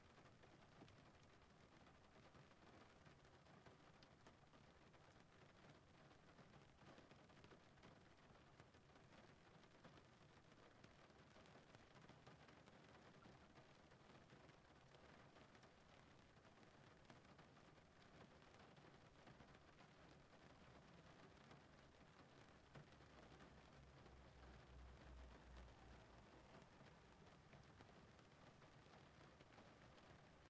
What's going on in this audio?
Car Internal Gentle Rain On Windscreen
Gentle rain pouring on the windscreen of a car recorded from the inside, with NTG-3.
Car, Rain, Raindrops, Water, Windscreen